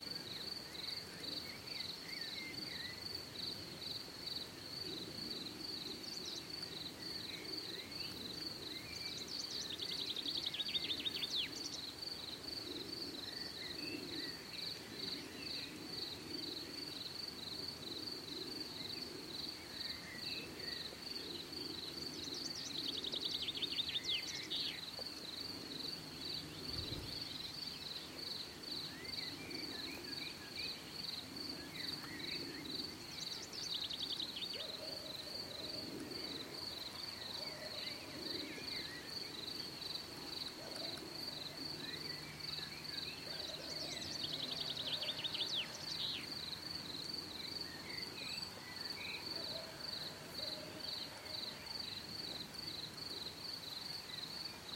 Sundown meadow
Place, date: Slovakia, 19.5.2015,19:32
wind, forest, birds, nature, field-recording, summer, meadow, ambience, wood, chill, insect, insects